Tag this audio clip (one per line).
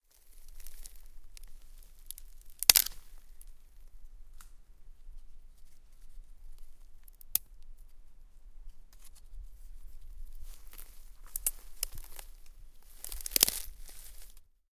ambience
branch
crack
crackle
crunch
field-recording
Nature
snap
snapping
tree
trees
twig
twigs